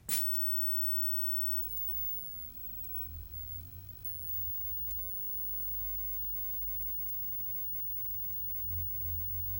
Recorder: Fostex FR-2;
Mic: Audix SCX1-O (Omni);
Mic Position: directly above back of TV set;
This is a recording of my about 7 year old TV CRT (PAL) when switched on. I left the line-sync frequency in it, which is at 15625Hz for PAL.